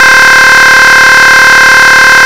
an electronic machine running, maybe not properly
broken, buzz, electronic, loop, loud, machine